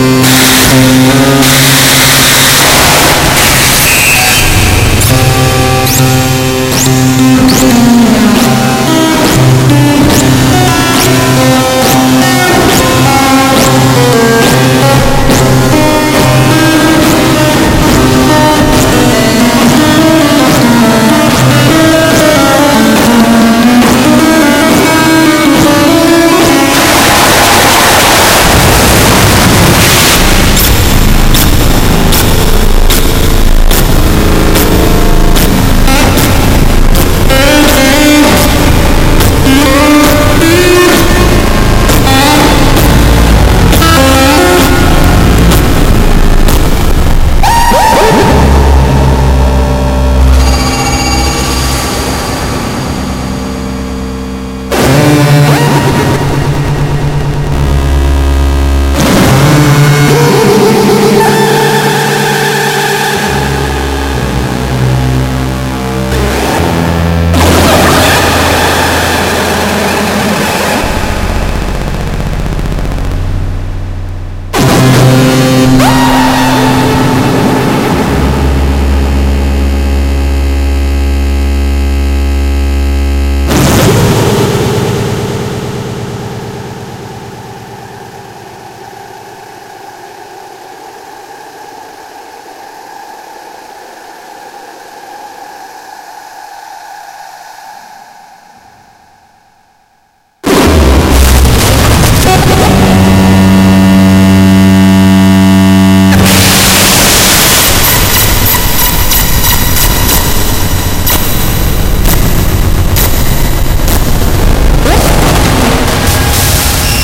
Boss DR 550 - MK2 1 (Digital Noise)
Hey, I am selling the Last Boss DR 550 I ever bent, they are pains in my ass. this was a two minute sample of Noisecore. just straight up F*cking noise from an old digital drum Beast. recorded in audacity. Enjoy....
- Kat
machine, k-a-t, sample, noise, bent, school, from, old, circuit, synth, ever, drum, last, boss